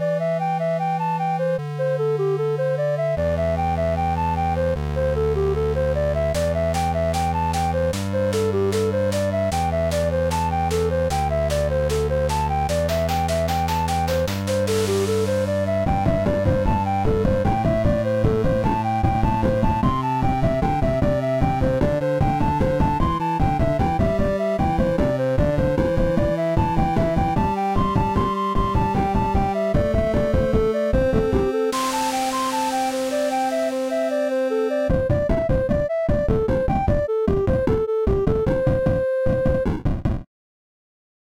Pixel Song #19
Loop, Music, Pixel